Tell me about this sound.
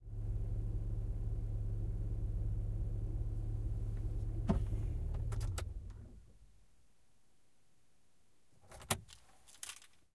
CAR-TURN OFF, Volkswagen Golf GLE 1.8 1992 Automatic, turning engine off and taking keys, interior-0001
Part of Cars & other vehicles -pack, which includes sounds of common cars. Sounds of this pack are just recordings with no further processing. Recorded in 2014, mostly with H4n & Oktava MK012.
automatic, keys, Volkswagen, vehicle, turning-off, engine, car, motor